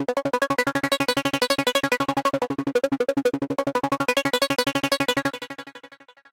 3 ca dnb layers
These are 175 bpm synth layers background music could be brought forward in your mix and used as a synth lead could be used with drum and bass.
atmosphere, drum, house, bass, electro, loop, layers, effect, techno, sound, fx, synth, beat, dance, music, rave, electronic, trance, club